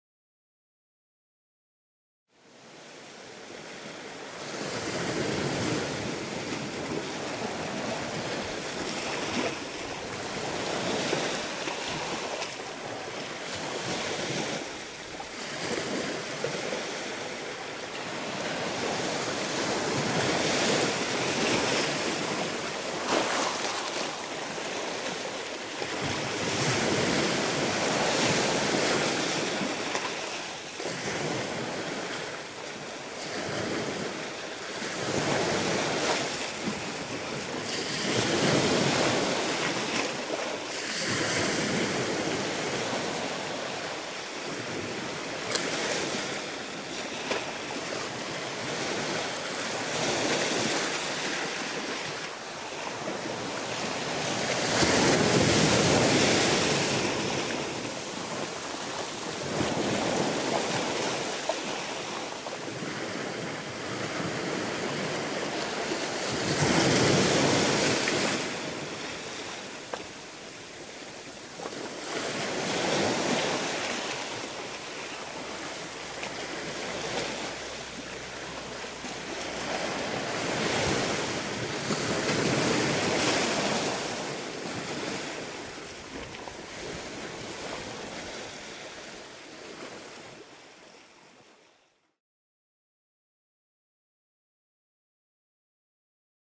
High tide on the beach, with moderately gentle waves and surf occasionally hitting the rocks and pebbles. Recorded on location at Long Rock Beach, near Penzance, Cornwall in England
beach, ocean, sea, sea-shore, surf, tide, water, waves